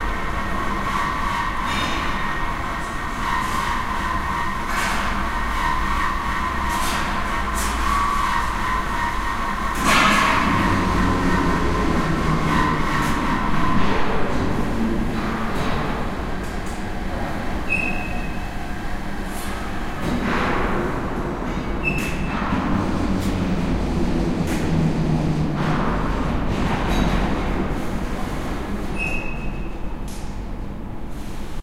The work of mechanisms in the metalworking workshop.
clatter, construction, hammer, hammering, knock, mechanisms, metalworking, work